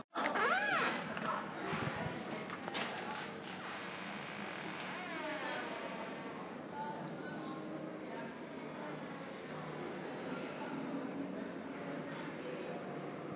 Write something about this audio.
Door creaks open at the end
door creak open